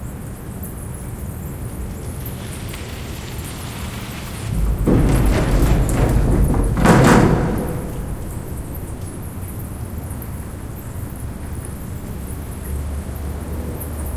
Bike on Bridge 1
A bicycle passes by on the wooden bridge underneath and behind the microphone perspective. My gains were turned up to capture the bats so this was loud enough to get hit by the limiter on the mixpre, still sounds pretty cool tho.
From a recording made underneath the 'Congress Bridge' in Austin Texas which is home to a large bat colony.